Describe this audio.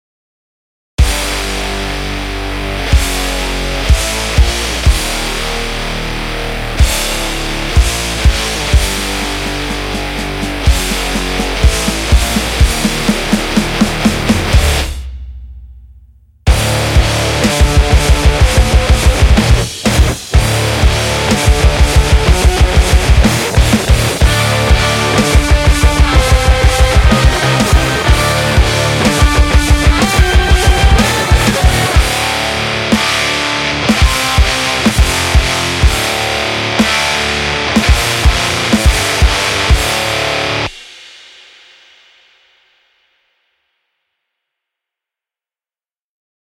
Short Prog Metal inspired track with lots of 0 and harmonics